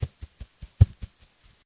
pull beat2
a set of samples created using one household item, in this case, bubblewrap. The samples were then used in a composition for the "bram dare 2"
it beats watching telly.........
dare2, bubblewrap